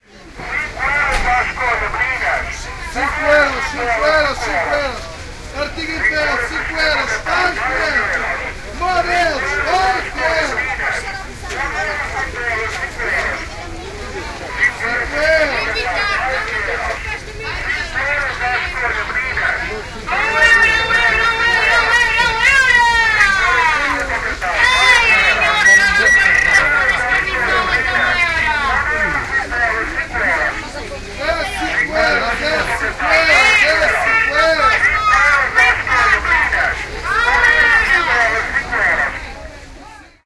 Portugese market traders
Noisy market traders competing for your attention